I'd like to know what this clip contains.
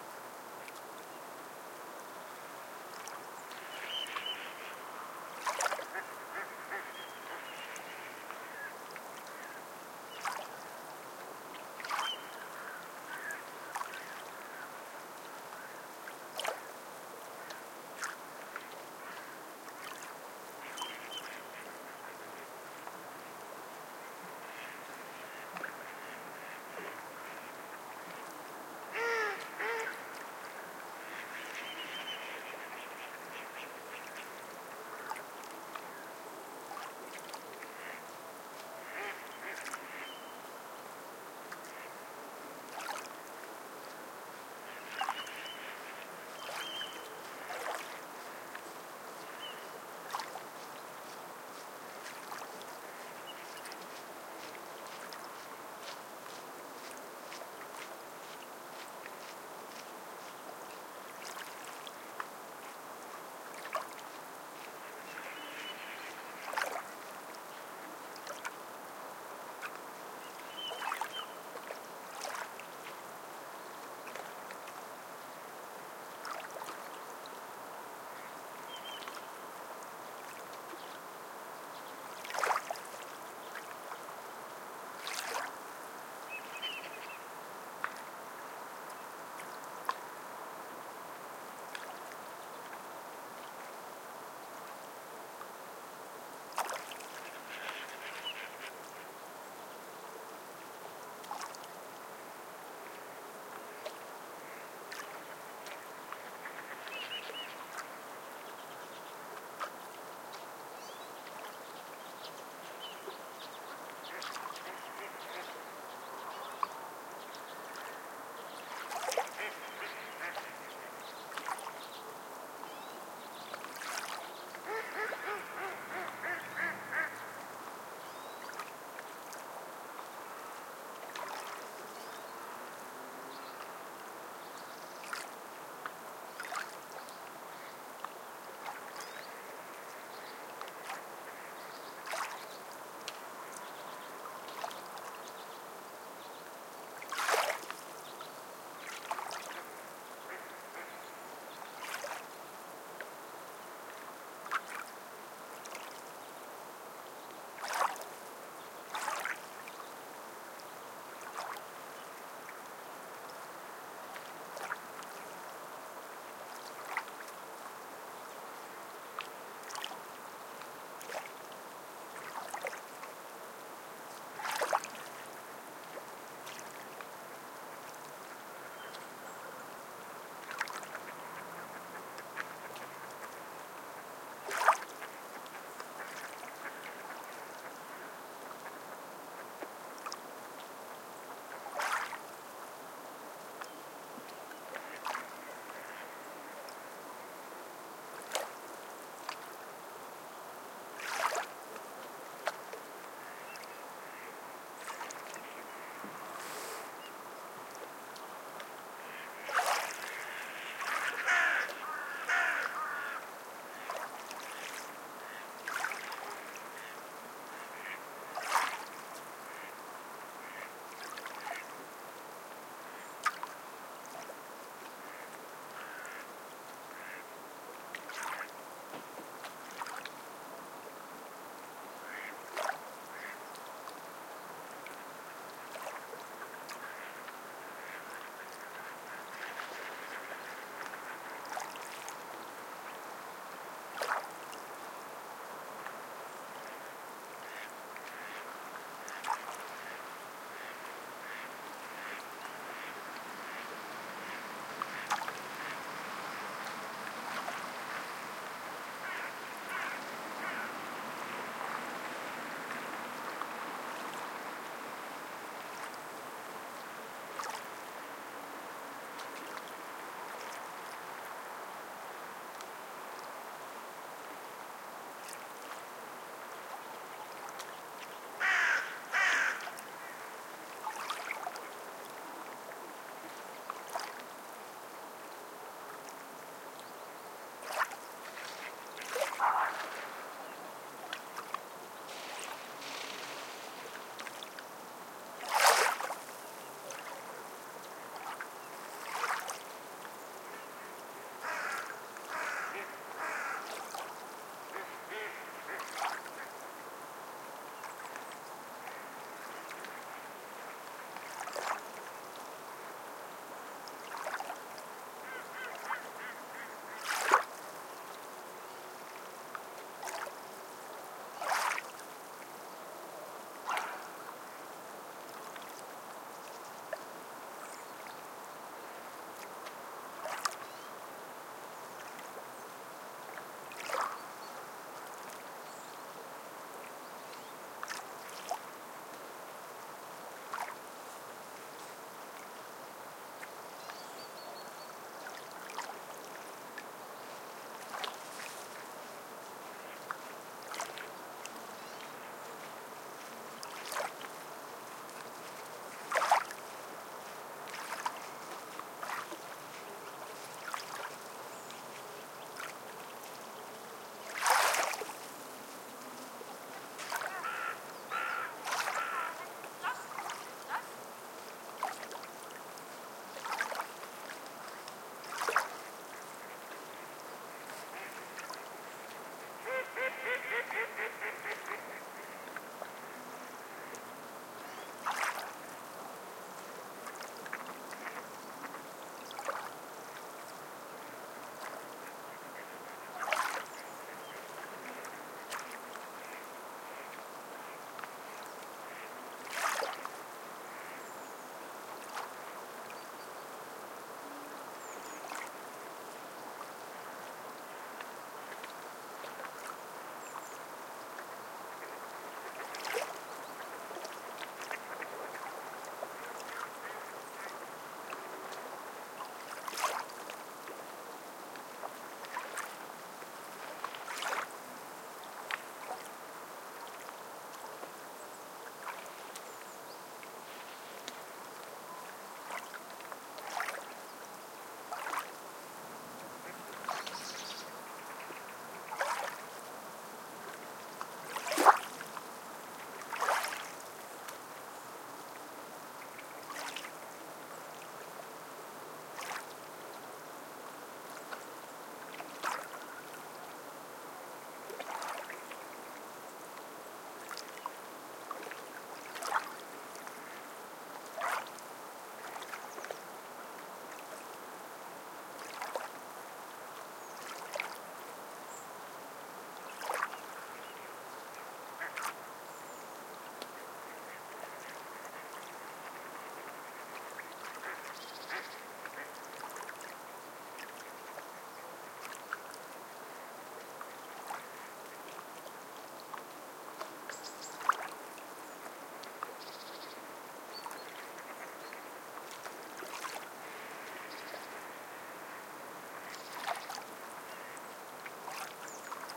Autumn beach sound
Autumn bird life at the beach on Sweden's southern east coast. Many ducks in the water and some crows in the trees some other creatures :)
Used equipment's
microphones two Line-Audio´s CM3
Software waveLab
CM3, F4, Sea, Zoom, beach, bird, birds, duck, ducks, field-recording, line-audio, nature, seaside, shore, water, wave, waves